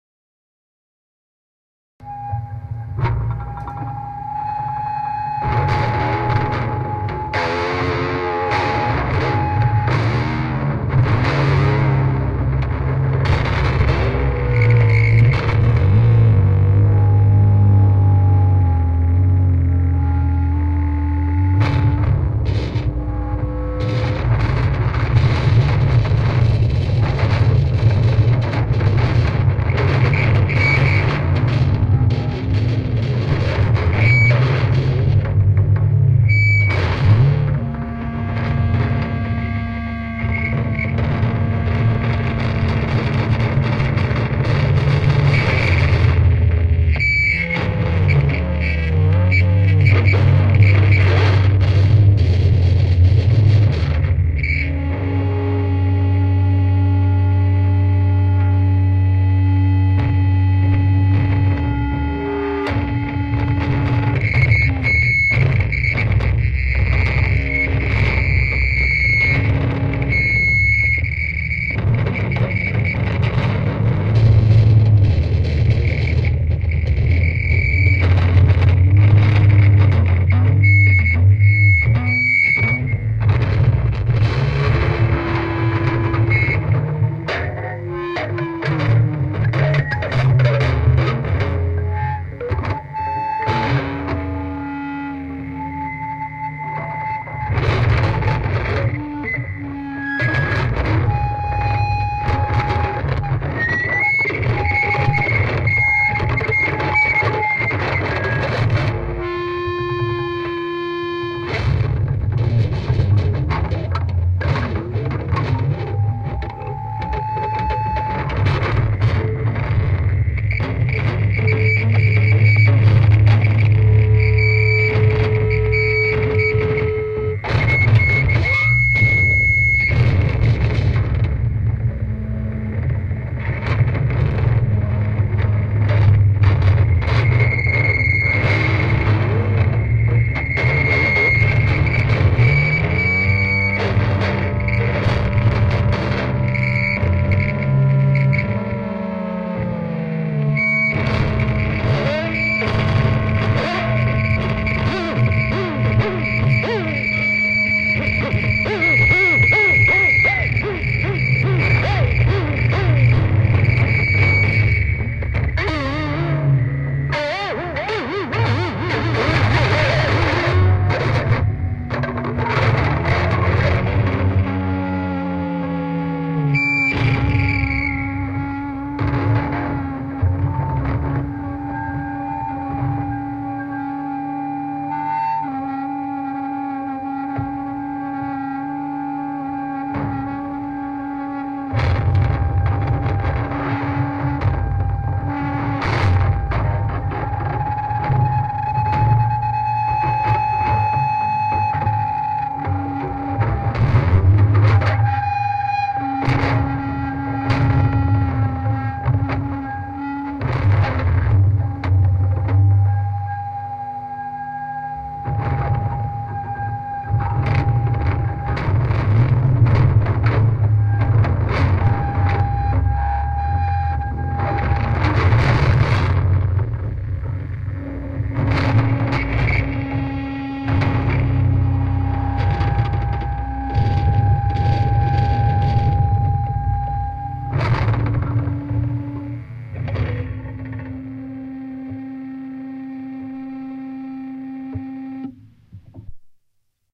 Smashing guitars
me and a mate just er, decided to smash 2 cheap guitars to bits, something to do at the time.
grunge, smashing, rough, trashing, guitars, noise, feedback